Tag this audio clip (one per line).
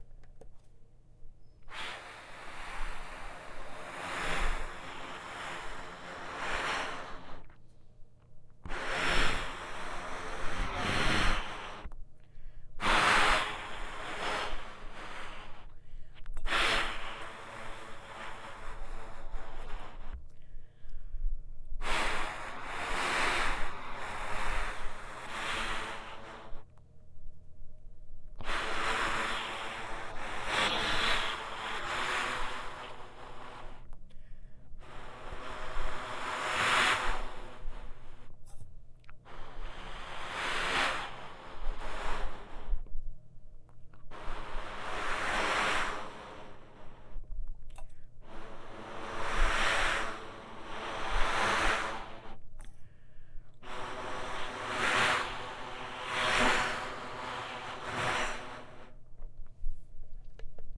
air
blow
blowing
metallic
trumpet
wind